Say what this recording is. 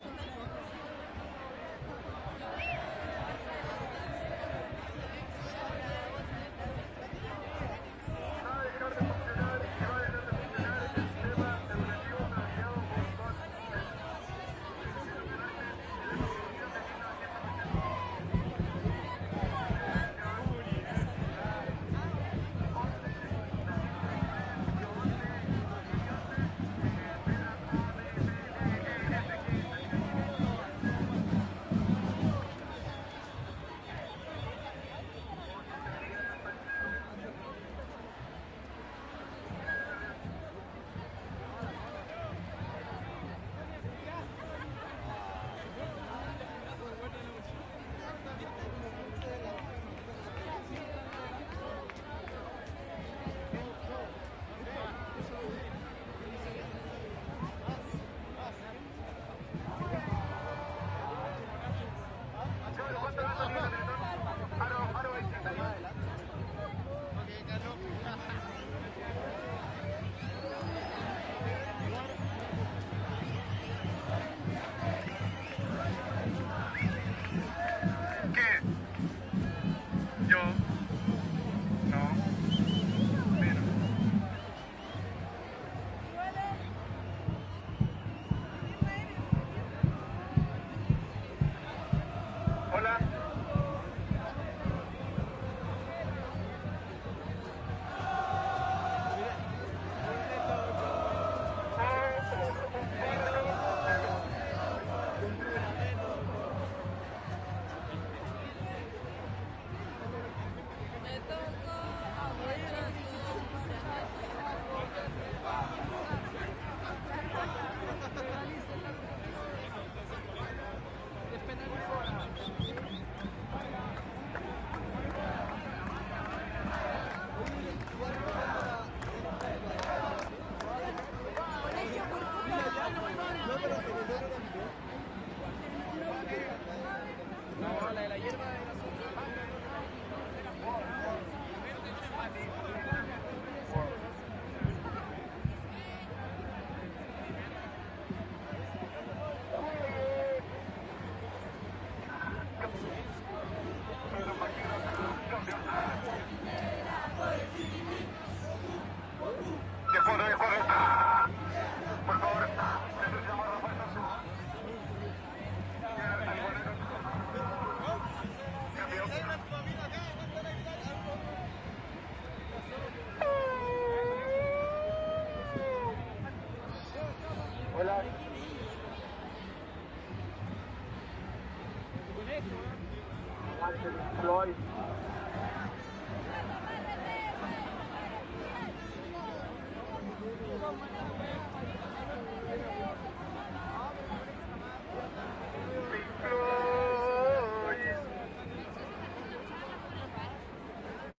marcha estudiantes 30 junio 10 - complemento lejano de la marcha
Desde baquedano hasta la moneda, marcha todo tipo de gente entre batucadas, conversaciones, gritos y cantos, en contra del gobierno y a favor de hermandades varias.
Diversos grupos presentan algún tipo de expresión en la calle, como bailes y coreografías musicales en las que se intercruzan muchos participantes.
complemento lejano de la marcha
Un poco lejos de la gente, se acerca un interlocutor con megáfono.
protesta, protest, marcha, batucadas, chile, sniff, de, conversaciones, march, cops, estudiantes, tambores, murmullo, santiago, crowd, carabineros, drums